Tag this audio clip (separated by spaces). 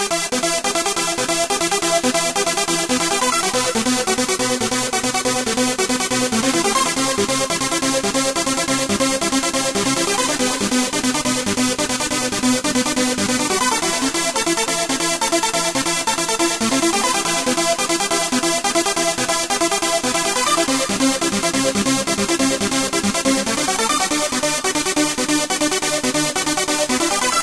140-bpm bass beat distorted distortion flange hard melody pad phase progression sequence strings synth techno trance